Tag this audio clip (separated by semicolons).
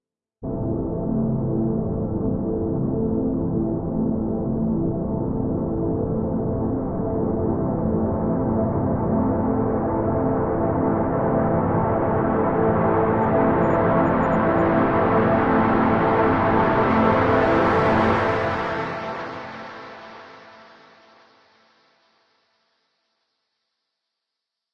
ambience; atmosphere; crescendo; dark; electro; electronic; intro; music; processed; synth